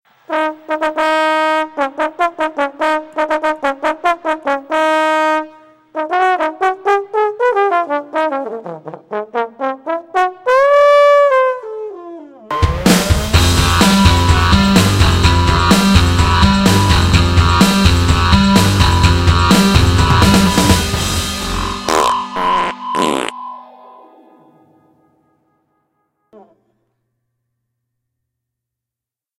Fanfare for the Slightly Uncommon Man (draft #1)
Fanfare for the Slightly Uncommon Man
Arranged and composed by His Majesty's Faithful Squire Rabitron.
with a little twist by King Wrong.
Contains sample taken from "Fanfare Jazz" by neonaeon.
Thank you
fanfare, sample, theme, fruity, loops, song